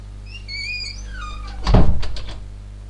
A door closing